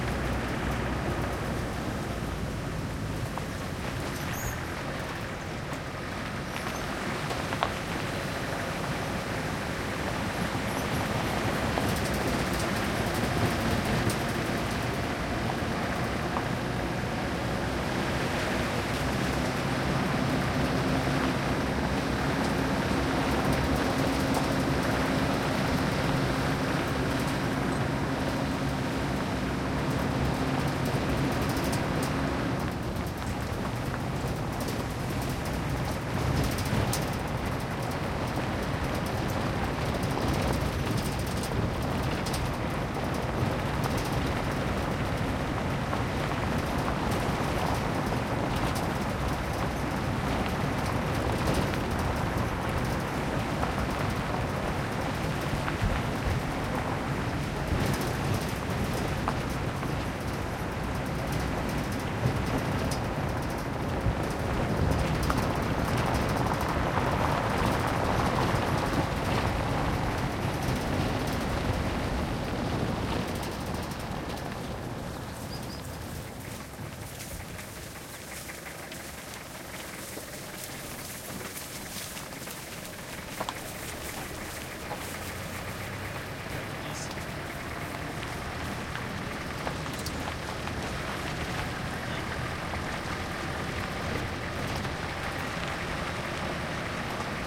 auto truck jeep onboard driving rough terrain dirt rock various speeds slow to stop and pull up MS
auto, dirt, jeep, onboard, pull, rock, rough, stop, terrain, truck, up